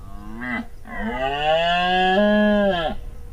201009.deer.roar.02
Mono, single male Red Deer roar during rutting season at Donana National Park, S Spain. Mic was a Sennheiser ME62 attached to K6 system
male, donana, sex, nature, field-recording, voice, summer, red-deer